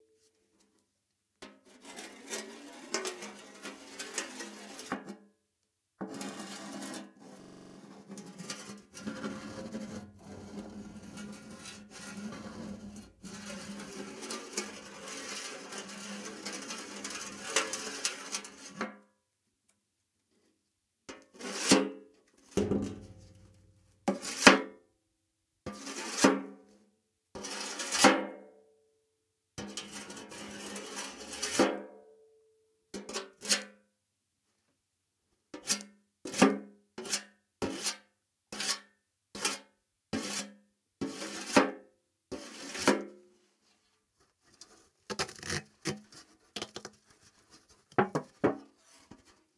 METAL SFX & FOLEY, metal scrapes (empty canister)
Some metal based sounds that we have recorded in the Digital Mixes studio in North Thailand that we are preparing for our sound database but thought we would share them with everyone. Hope you like them and find them useful.
Boyesen, Sheffield, Alex, Digital, Ed, canister